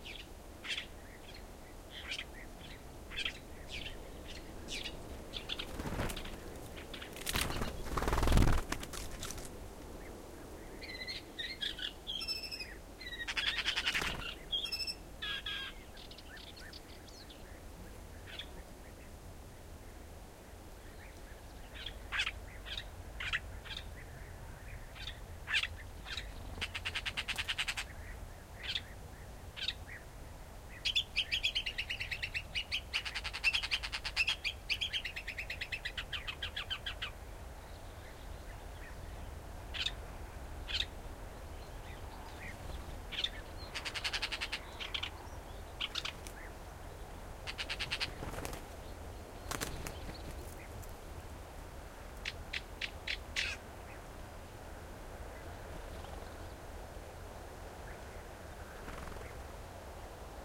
A couple of birds have a serious argument near a pair of mics placed on opposite sides of a Pine tree. You may hear angry calls, fluttering, noise of feet on branches, wind on trees, and Bee-eater calls in background. I wish I had identified the birds but this was a fortuitous capture on unattended recording, can you help? (Reinsamba?)
Shure WL183 omni pair (with DIY windscreen) to FEL preamp and Edirol R09 recorder